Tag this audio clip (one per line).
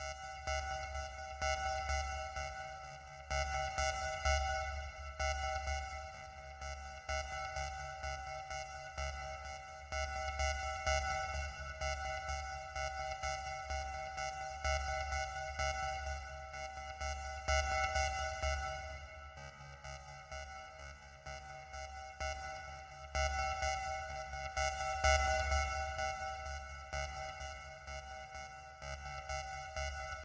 atmo
atmosphere
electronic
element
loop
synth
techno